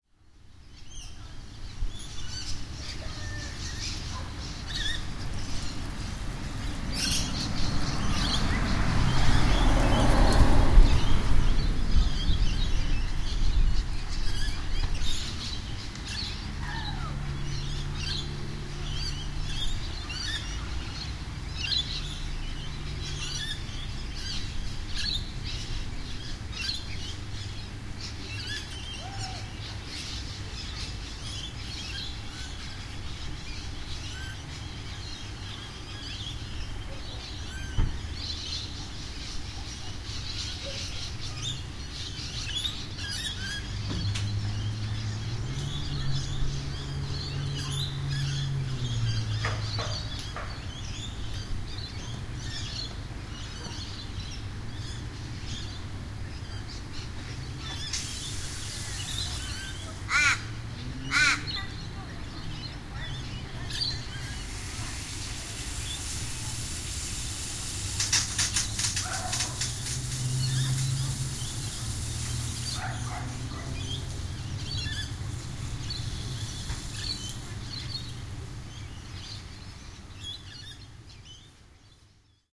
Front Suburban Yard In Australia 8pm
This is one of my first experiments with binaural recording. I am standing in my front garden, there a lots of parrots in the trees chatting, kids playing up the street, a car passes, a raven 'caws' above me and my sprinklers start. Listen to this through headphones for the best effect.